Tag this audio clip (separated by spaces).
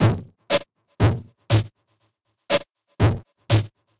120-bpm beat computer electronic loop noise